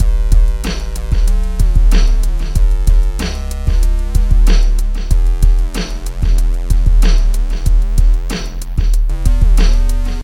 when hip_hop was real and rough.3
created in reason..........bass.......and beat.